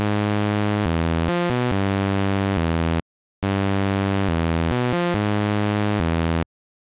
Generic "Nintendo like" melody.